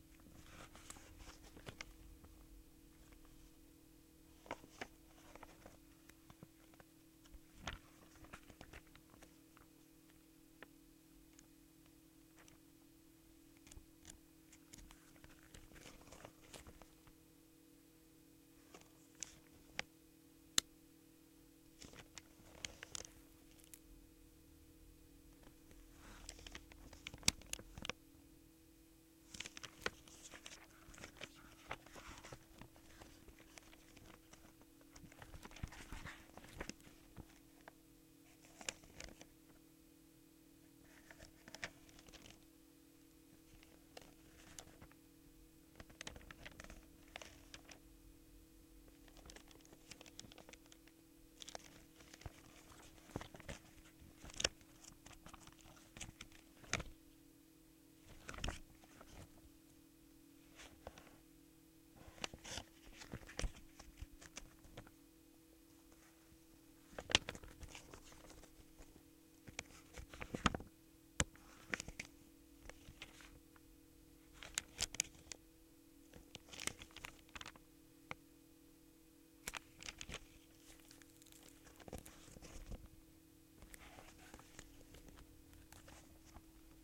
foley: opening, turning and folding a magazine
paper, rustle